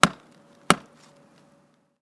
Small sledge hammer directly striking hardened cement, two dull thuds.